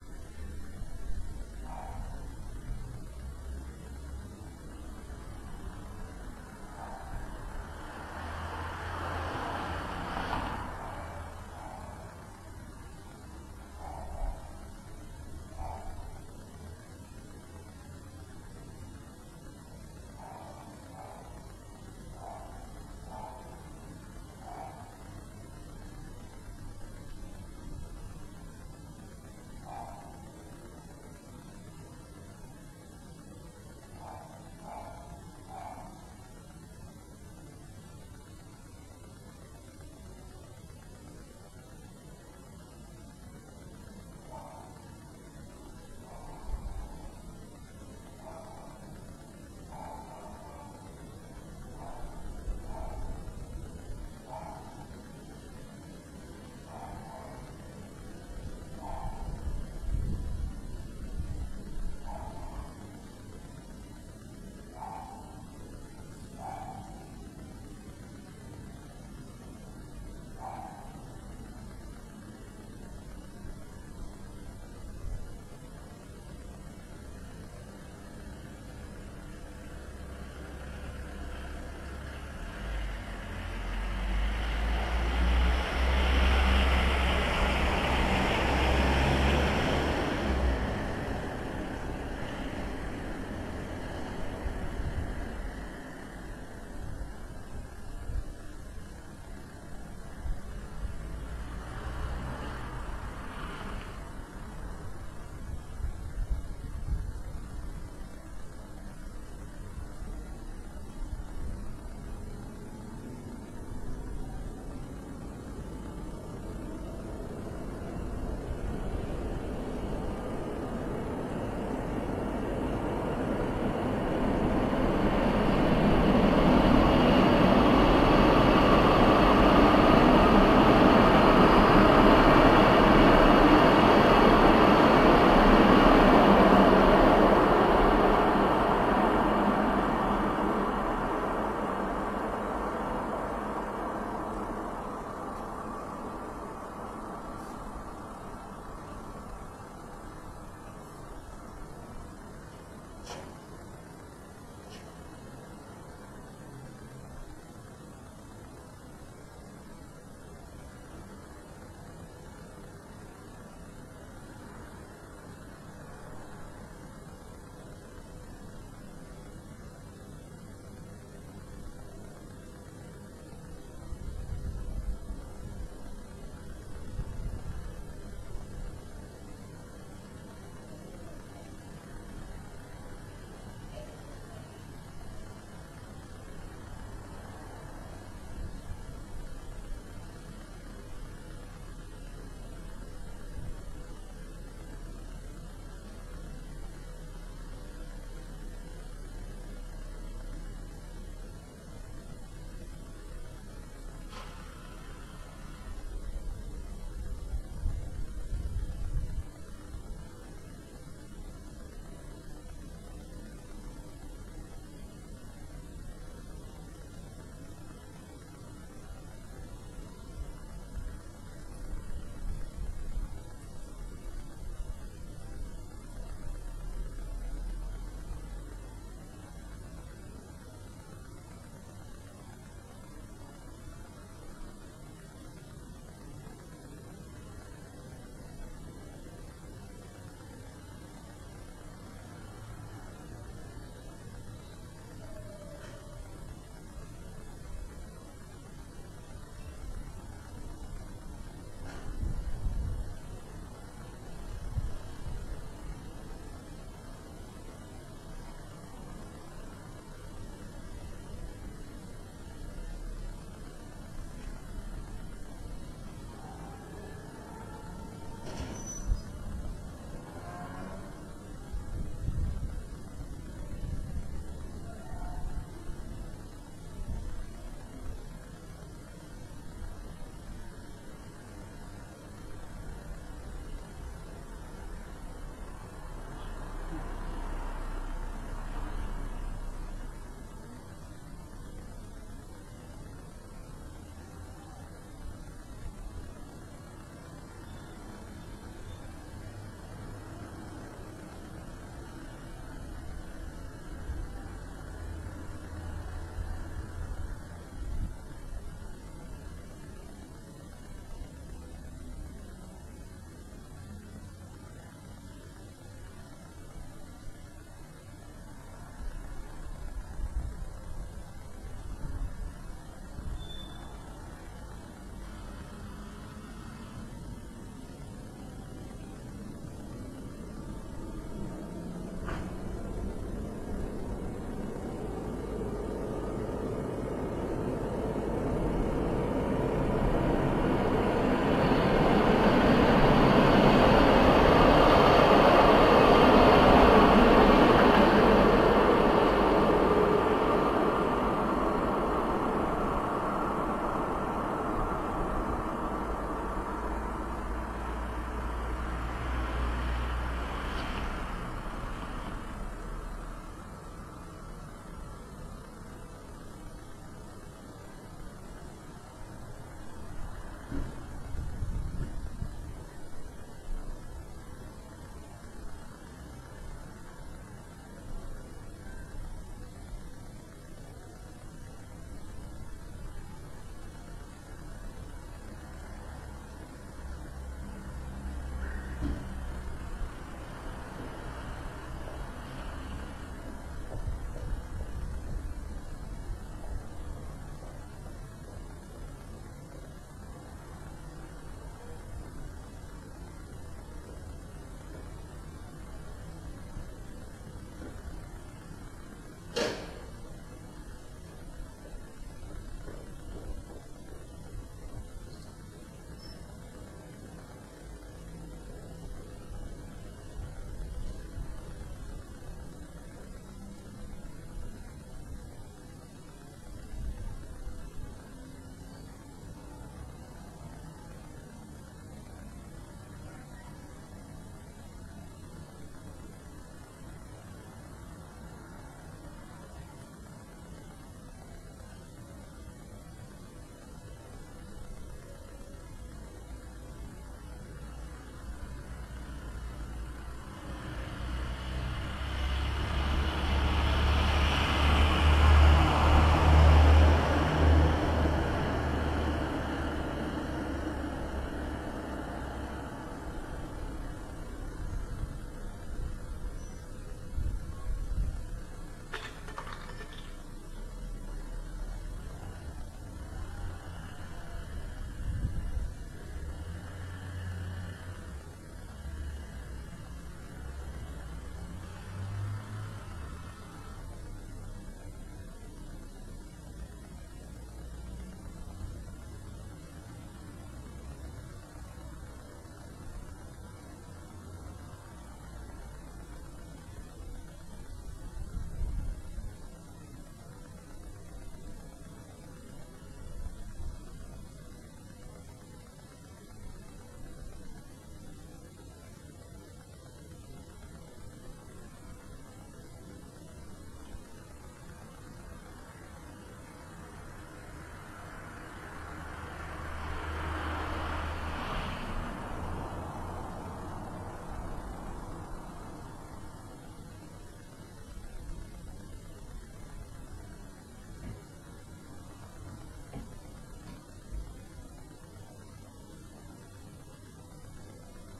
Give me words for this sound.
This was recorded from a window of my house around midnight (2008-06-08). You can hear a dog barking in the neighbourhood, the cars passing by and the trains departing from the station that is not too far. I was lucky to record two trains in a row, since at that hour they are not very frequent. This was recorded from a 2nd floor (or 3rd floor). The sound was leveled to match the level of the other sample (not normalized) and the noise cleaned (as always). However I let some residual noise to create some illusion of presence.